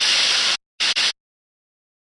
Old tech glitch sound, made by processing and editing steam sound coming from cooling a hot metal surface with cold water.